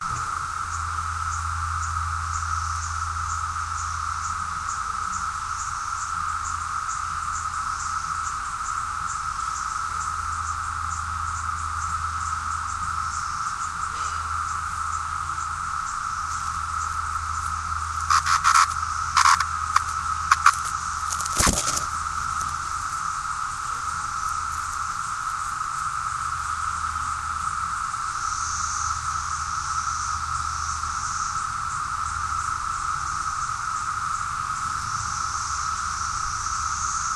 cicada crickets
cicadas 2013 Virginia, US
cicadas, crickets, insects, summer